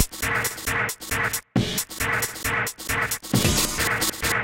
Breakcorey loops recorded around 270 Miles Per Hour. Took a few
breakbeats into Zero X beat creator and exported each piece (slice it